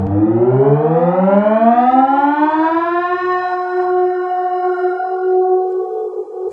Mangled snippet from my "ME 1974" sound. Processed with cool edit 96. Some gliding pitch shifts, paste mixes, reversing, flanging, 3d echos, filtering.
child, human, processed, stereo, voice